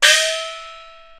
Recording of a single stroke played on the instrument Xiaoluo, a type of gong used in Beijing Opera percussion ensembles. Played by Ying Wan of the London Jing Kun Opera Association. Recorded by Mi Tian at the Centre for Digital Music, Queen Mary University of London, UK in September 2013 using an AKG C414 microphone under studio conditions. This example is a part of the "Xiaoluo" class of the training dataset used in [1].
percussion, xiaoluo-instrument, idiophone, qmul, compmusic, peking-opera, chinese-traditional, china, gong, beijing-opera, icassp2014-dataset, chinese